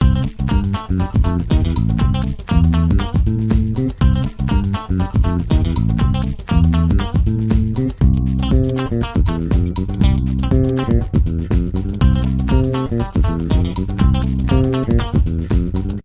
Game background Music loop short
This Audio track was created with Apple Garageband back in 2013.
It was part of a game I made for my bachelors thesis.
This was the loop music loop while playing the game.
action, ambient, background, base, bass, comic, funk, funky, funny, game, guitar, music, perceussion, positive, strings, synth, theme